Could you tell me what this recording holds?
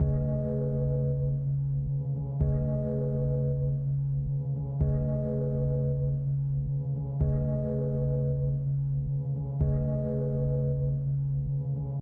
Synth Wave 2 (100 BPM) 5bar-Uneven
atmosphere, Loop, Ambient, Sound-Design, Looping, commercial, Ambience, Drums, Cinematic, Ambiance, Piano